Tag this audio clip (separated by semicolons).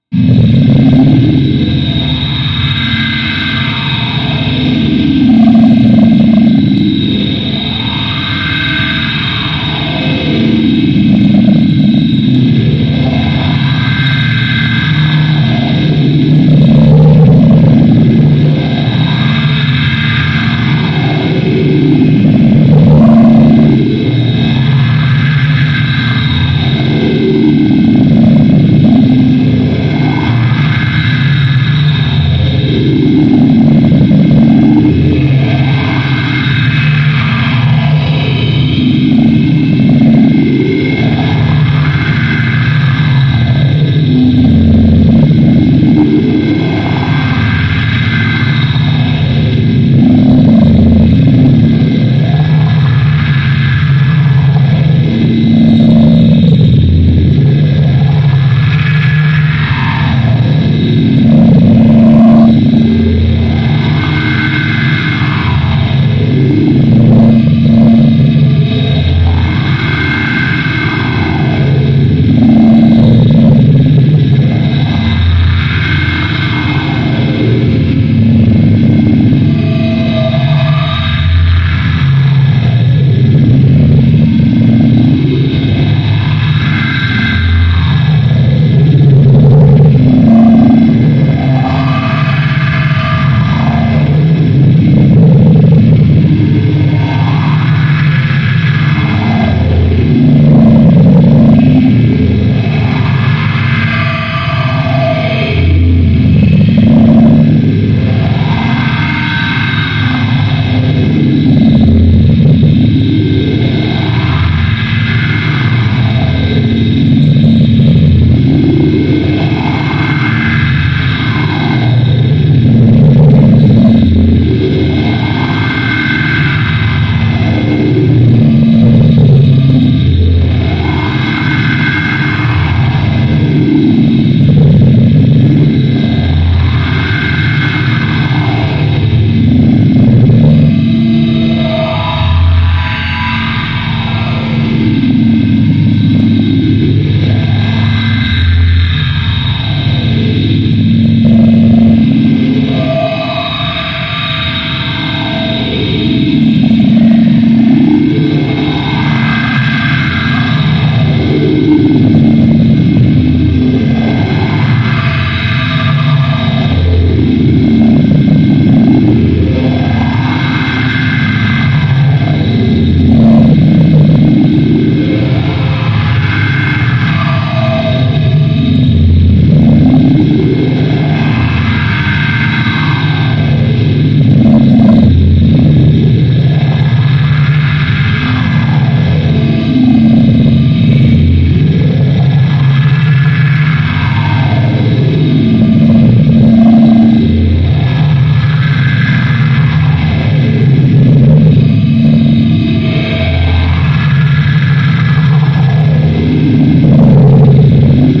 ambience; ambient; appliances; atmosphere; dark; drone; effect; electronic; flanger; guitar-amp; interior; noise; processed; refrigerator; sci-fi; soundscape; wind